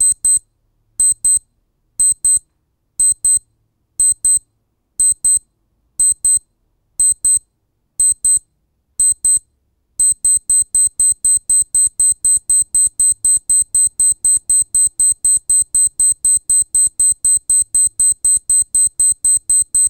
A recording my Timex wrist watch alarm going off. Starts off slow and gets faster. Wakes me up every day. Recorded with a Lectrosonics wireless microphone through Canon XL1 DV camera, trimmed with Spark XL.
alarm, alarm-clock, beep, beeps, timex, watch, wrist-watch